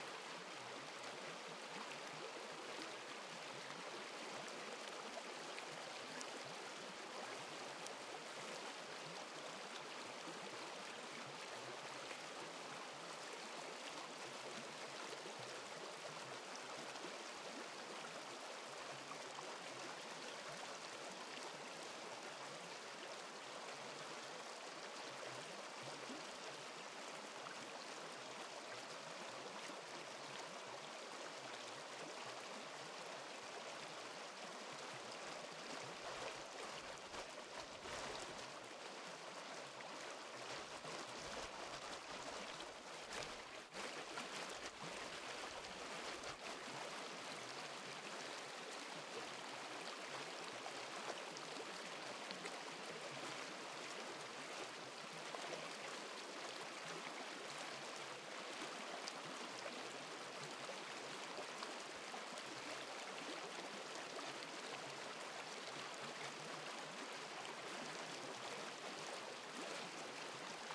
Babbling Brook at Allegheny State Park
A babbling brook in Allegheny State Park, NY - Early November (Fall) 2018
ambiance, ambience, ambient, atmosphere, field-recording, forest, moving-water, nature, outdoors, running-water, soundscape, water, white-noise